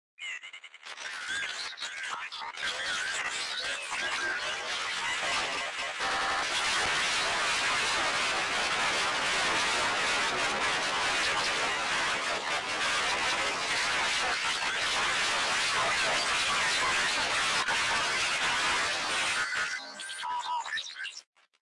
Talking Through your ass
A few sample cuts from my song The Man (totally processed)